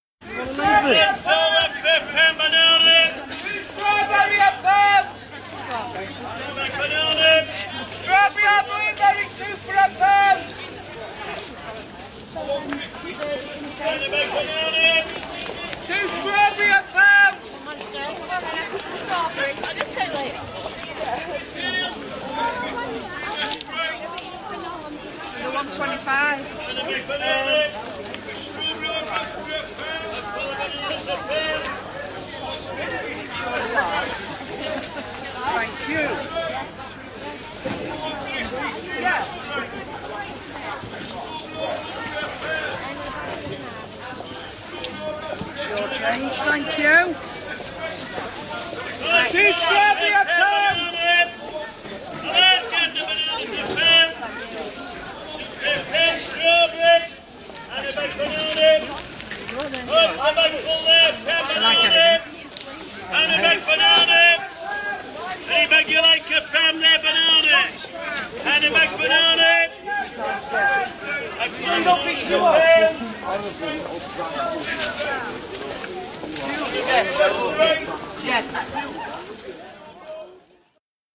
Two vendors at a market stall in Doncaster, South Yorkshire, shouting about offers with bananas and strawberries. Male voice. 4th-gen-ipod touch, edited with Audacity
strawberries, banana, market, market-day, male, town, sale, doncaster, bananas, stall, vendor, field-recording, offer, strawberry, people, ambience, announcement, voice, fruit